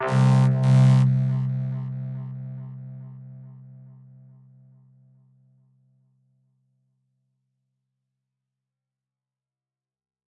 SynthClass+LowSqueek+VocoHelium+Delay
fx, electronic, studio, loop